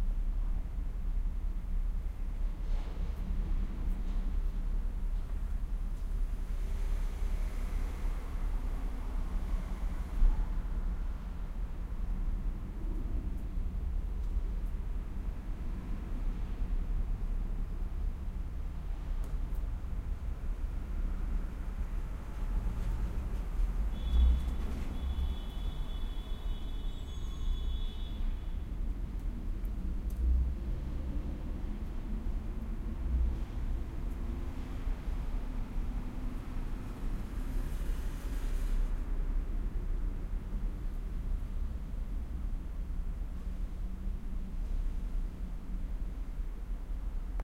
Los Angeles morning Ambience
Some rumble and ambience recorded in the wee hours of Los Angels with an Edirol R-09.
low-rumble, Ambience, Honking, Traffic, rumble